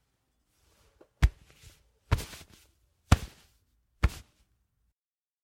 Hitting cloth
clothing, cloth, clo, clothes